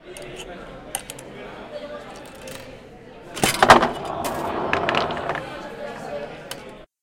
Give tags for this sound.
table
football
bar
UPF-CS12
futbolin

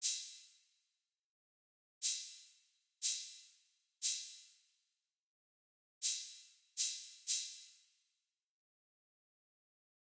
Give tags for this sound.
percussion-loop
drums

